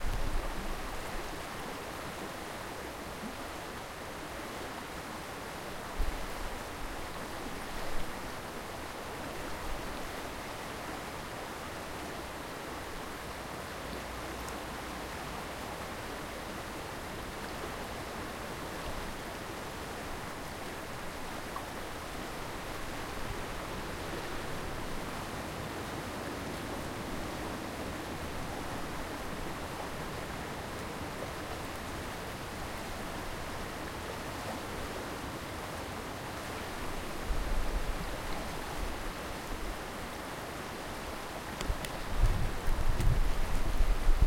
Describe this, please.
Wind in trees beside river
Small river, wind in trees
field-recording, river, wind